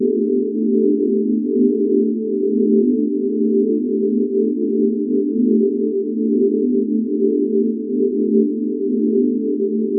Created with coagula from original and manipulated bmp files. Space pad at A 440 hz.
440, a, image, pad, space, synth